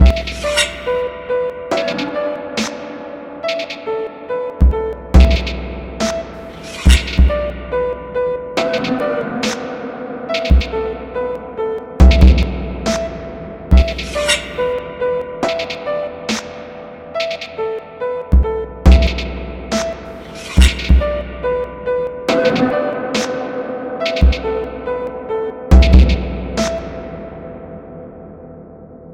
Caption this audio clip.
A sinister drill loop, heavy on reverb, in C# minor, featuring drums, stretched 808s and piano. I'd love to hear someone rap over this - if you use it in your own music, please leave a link in these comments.
beat, drill, loop, piano, ukdrill